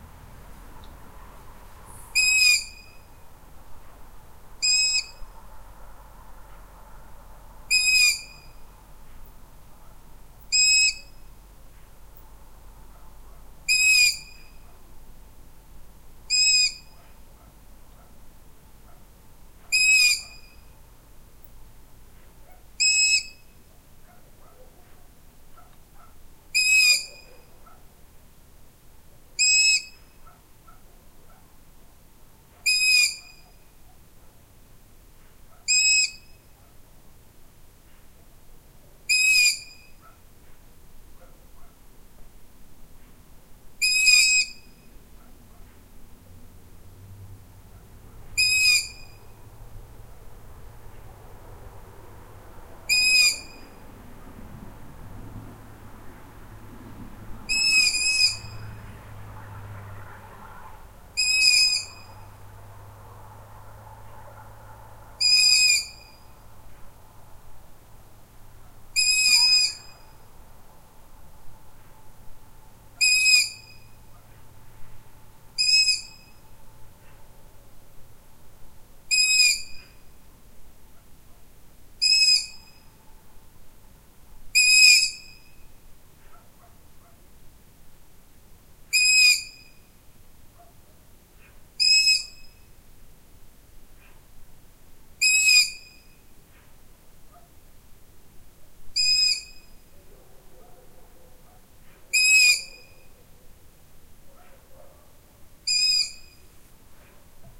long eared owl
Field recording of a juvenile long-eared owl on a warm summer's evening in County Kilkenny, Ireland. At this time of the year the the young birds leave the nest but stay close by for a month or so. This is the cry out to the parents begging for food.
Recorded on Sony PCM-D100